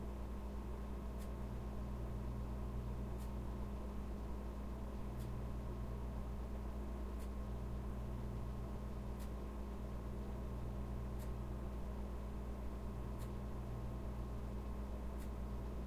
Fridge Compressor (loop)
foley recording of the drone from a refridgerator compressor
includes a clock in the background
appliance, fridge, household, kitchen